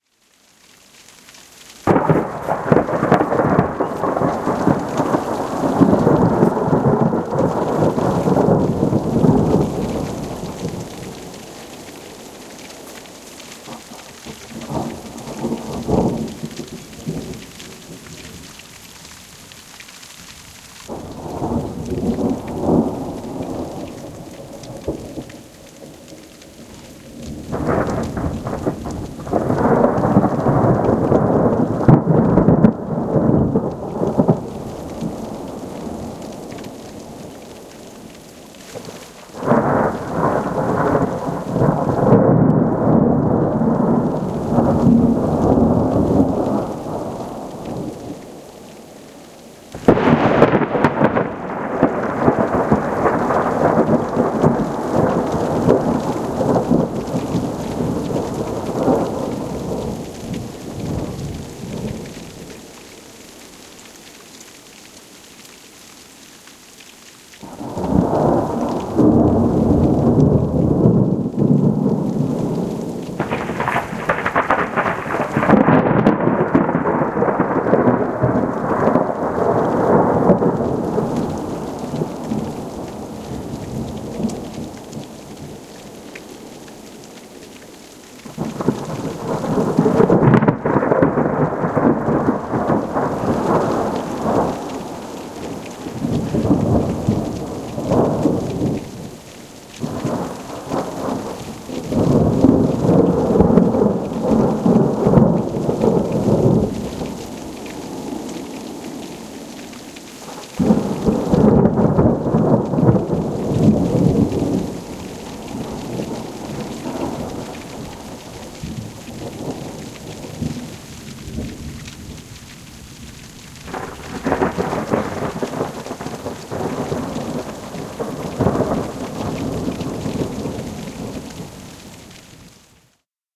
br Thunder1
Recorded in Colorado mountains with some great echos.
field-recording, thunder